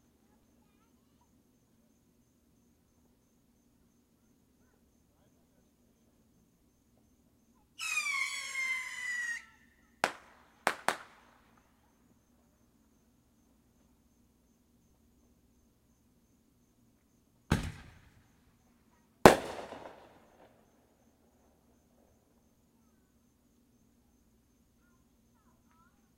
Fireworks recorded with laptop and USB microphone late at night, time to wrap it up guys, seriously.